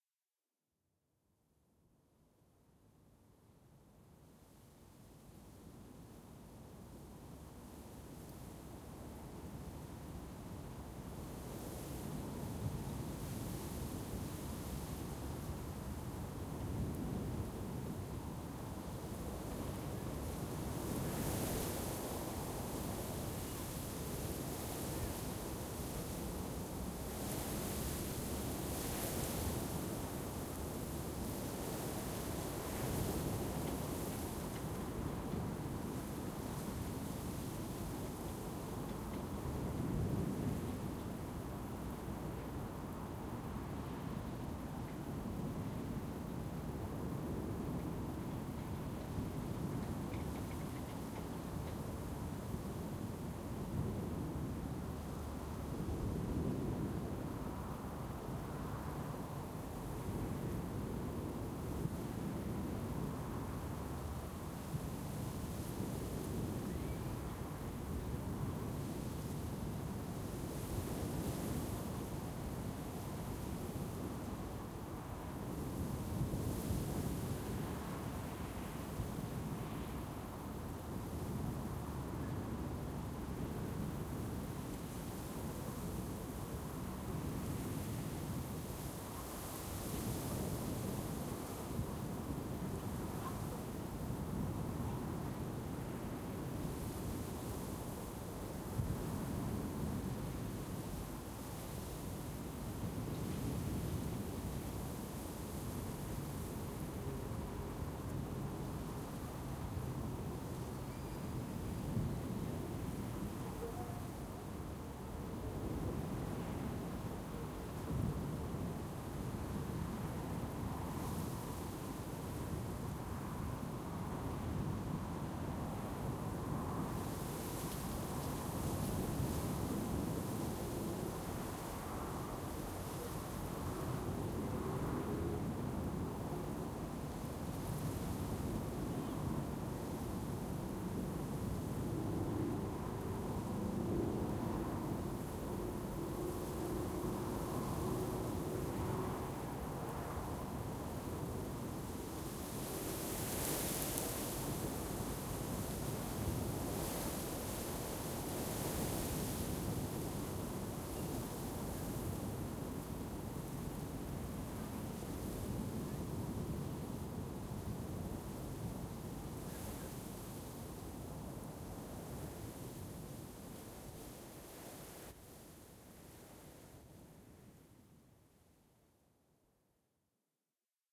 scroby-sands far away waves and wind deep atmosphere
Deep far away wind and waves breaking at scoby sands
seaside, nnsac, lapping, sea, sea-shore, great, ocean